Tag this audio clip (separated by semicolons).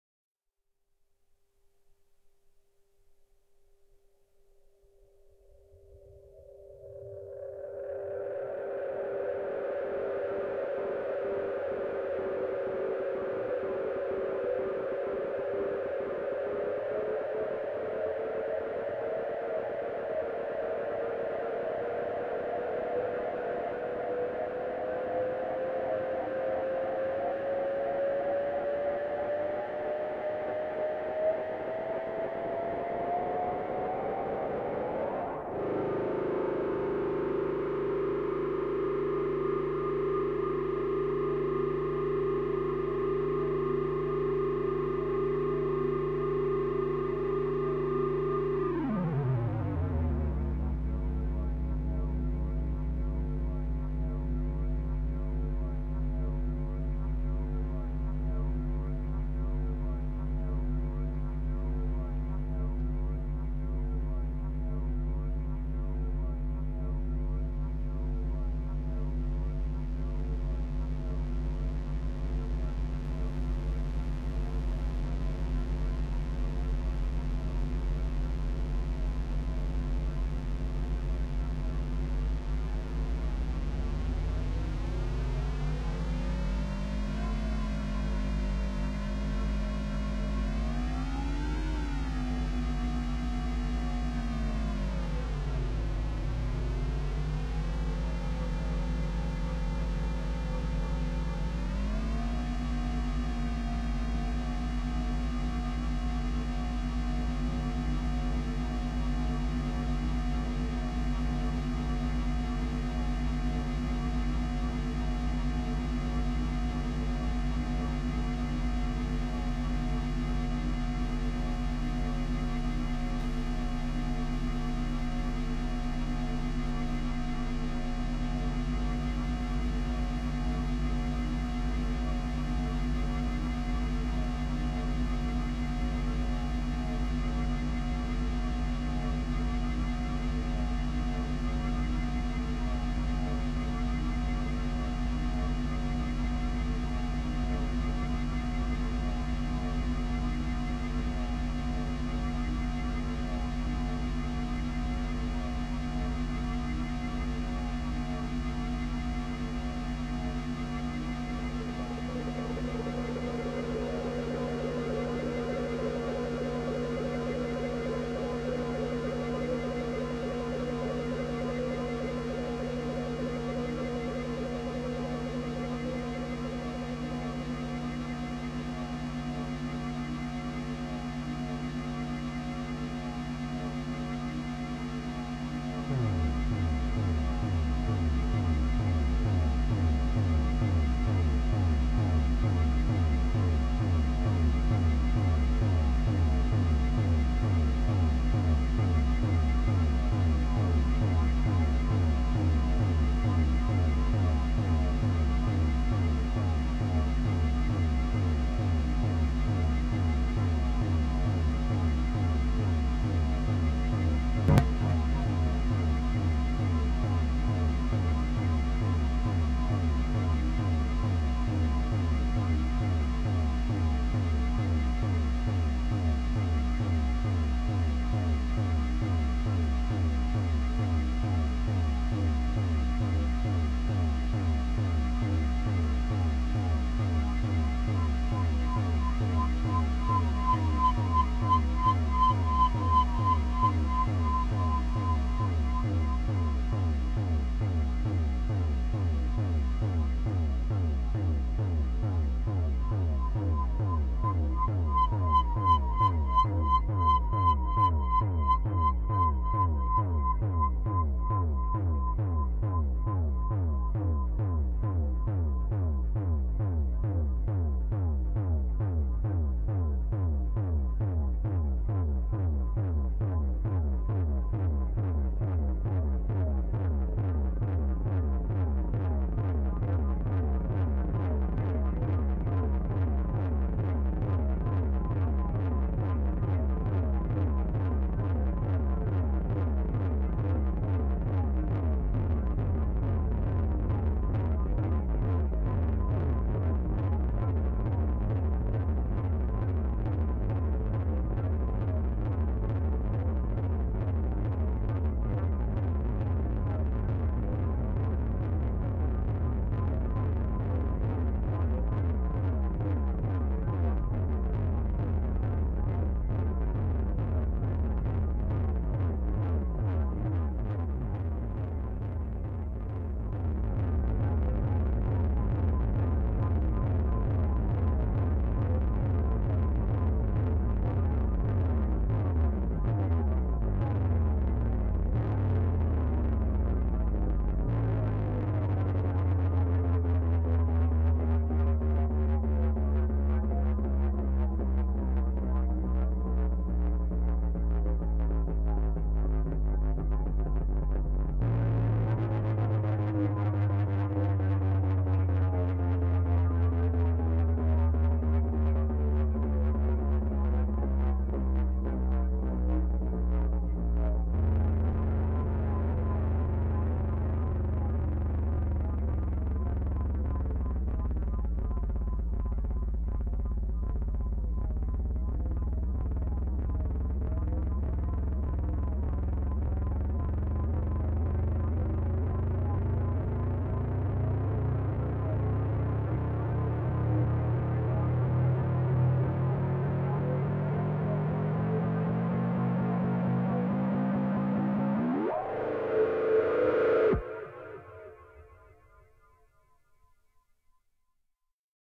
suspenseful; Movie; Film; Spooky; Ambience; Dark; Drone; Free; Ambiance; Horror; Atmosphere; Cinematic; Ambient